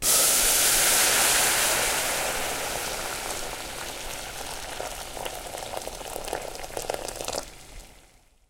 Boiling water striking the base of a hot saucepan